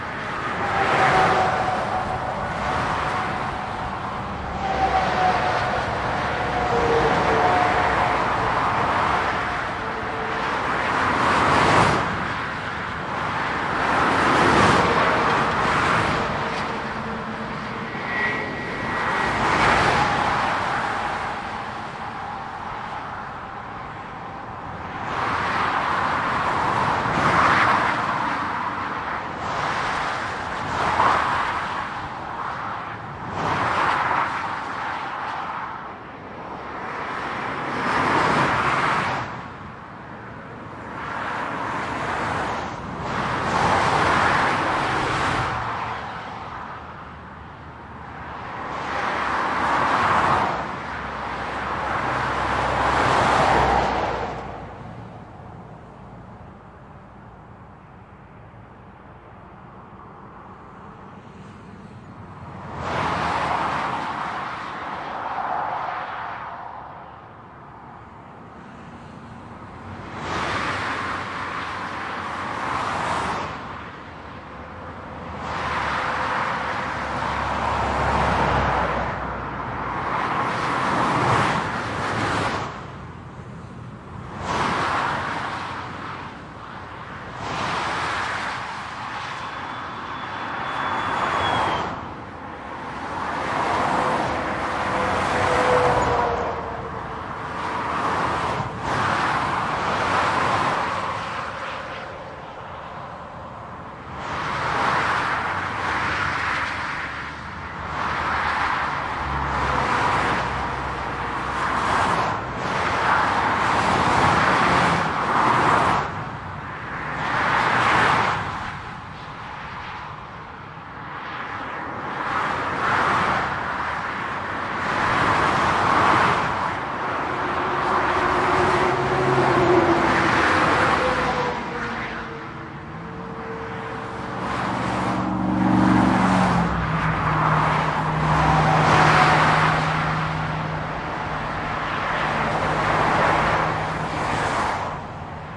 4ch field recording of an overpass over a German motorway, the A38 by Leipzig.
The recorder is located on the overpass, approx. 5 meters above the median strip, front mics facing outward toward the motorway. The traffic is moderately heavy, with a good mix of cars and trucks emerging from under the overpass or driving under it.
Recorded with a Zoom H2 with a Rycote windscreen, mounted on a boom pole.
These are the FRONT channels, mics set to 90° dispersion.